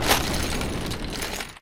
impact destruction 1
Sound of impact with break into small pieces